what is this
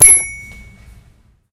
My toy piano sucks, it has no sustain and one of the keys rattles. This really pisses me off. So I went to Walmart and found me a brand new one, no slobber, no scratches, no rattling. The super store ambiance adds to the wonder.